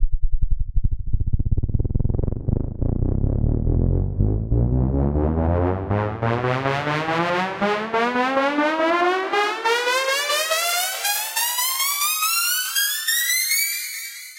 Pitch Rising D.
A rising pitch modulation starting in D and ending in a higher octave D.